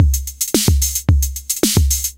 TR-606 (Modified) - Series 2 - Beat 02
Beats recorded from my modified Roland TR-606 analog drummachine
Drum, Electronic, TR-606